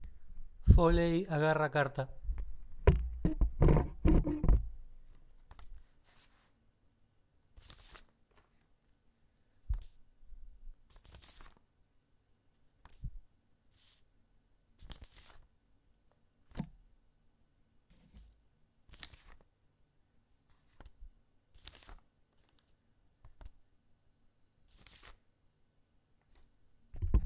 Recorded with Zoom H1 for a short movie